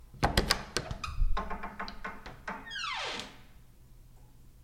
door open 1
A sound of a clattering door that also screeches.